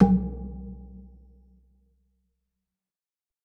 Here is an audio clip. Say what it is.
Tweaked percussion and cymbal sounds combined with synths and effects.
Wood, Deep, Percussion, Oneshot, Log